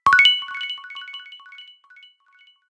MA SFX SysEnter 4
Sound from pack: "Mobile Arcade"
100% FREE!
200 HQ SFX, and loops.
Best used for match3, platformer, runners.
lo-fi, sci-fi, 8-bit, sound-design, freaky, machine, loop, electric, sfx, digital, soundeffect, abstract, effect, future, free-music, noise, game-sfx, electronic, glitch, fx